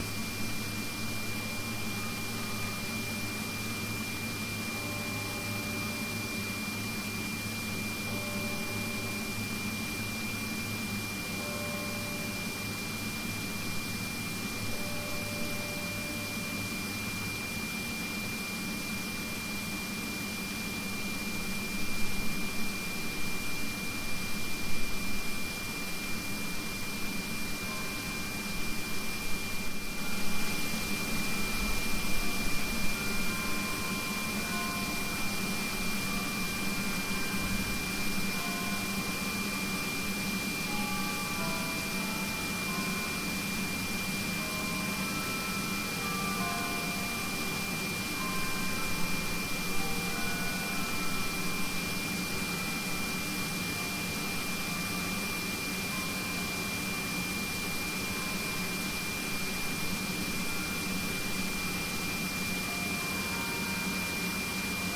Condenser Pumps with Bell
Noise created by high-speed impellers that move hot water into condenser fountains at a cooling plant.
condenser, cooling, hum, humming, industrial, machine, machinery, mechanical, motor